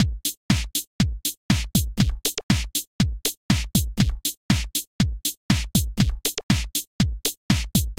Simple 4 bar loop with a house feeling to it